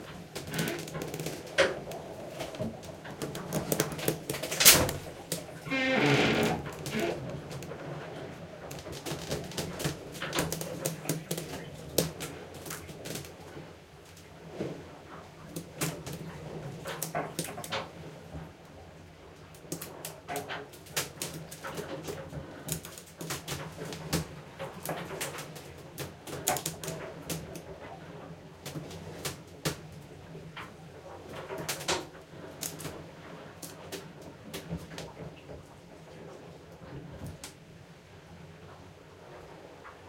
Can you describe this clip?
Lovis ship sailboat below deck wood creaks next to mast bottom close intense cool +water sloshing
ship, sailboat, below, deck